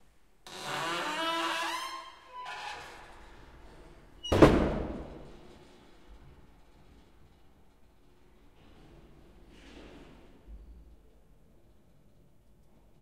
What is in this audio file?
Door open with creak and close.
XY-stereo.
Recorded: 2013-07-28
Recorder: Tascam DR-40
See more in the package doorCreaking
close, creak, creaking, creaky, door, open, slam, squeak, squeaking, wood, wooden